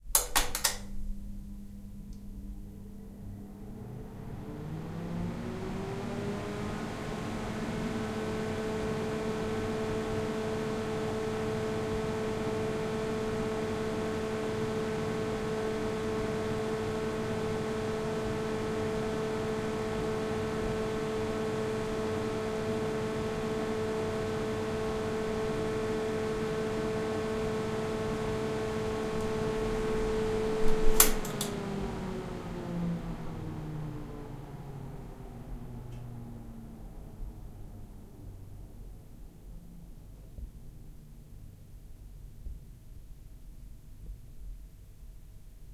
Bathroom Fan Ventilator
Fan / Ventilator in a bathroom;
turned on - running - turned off;
recorded in stereo (ORTF)
bathroom, fan